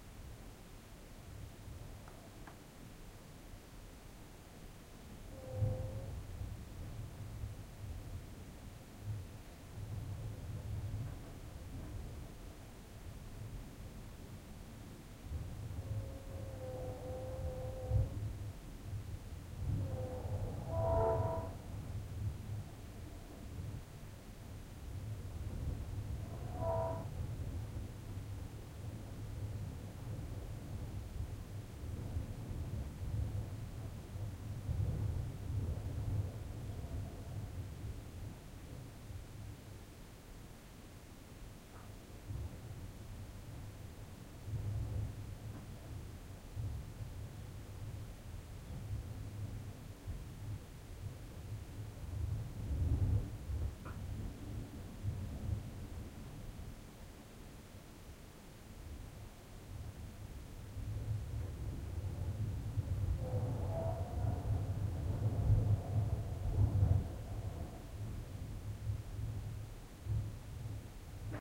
WindowWindScream 04 Long
When the wind blows strongly from certain directions, the window in my living room howls. Sometimes the sound is almost like a train horn.
Recorded on 29-Mar-2020. According to the weather forecast, the wind has been blowing with speeds of ~50 miles per hour. Although it seems to me to be stronger than on other occasions we had similar wind speeds reported.
howling, wind